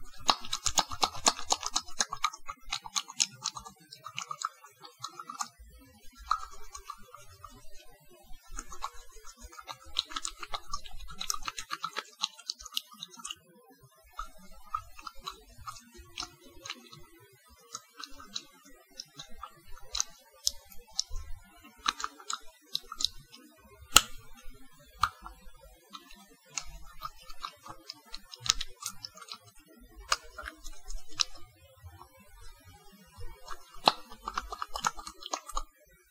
soapy sounds
rubbing mousse in my hands to sound like hand soap
hands, wash